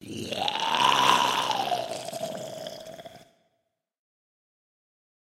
growling zombie
Drank milk to get lots of phlegm and recorded a growl in mixcraft 6 with effects.
darkness, growl, zombie, grisly, satan, demon, satanic, wierd, demonic, evil, possessed, monster, video-game, demons